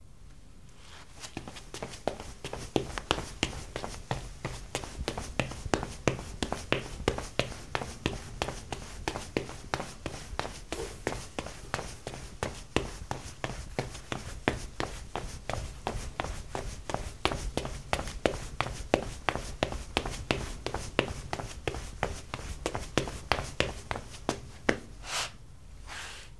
Pasos rápidos loseta
Trotando en loseta. Running on tile.
corriendo, footsteps, loseta, pasos, running, tile